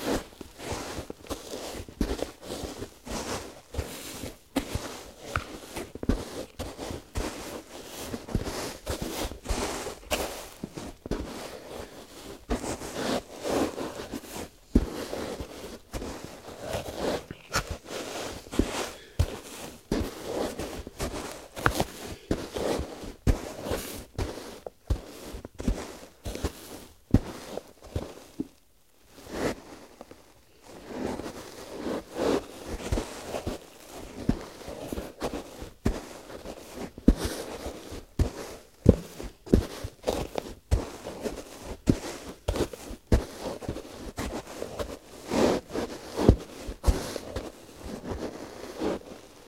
Footsteps Cowboy Boots Damp Sand Created
Created footstep foley of cowboy boots walking in damp sand.
foley, footsteps, fx, sfx, sound, sounddesign, soundeffects, soundfx, studio